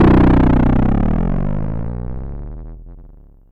Vermona DRUM 12
From the Drum 1 Channel of the Vermona DRM 1 Analog Drum Synthesizer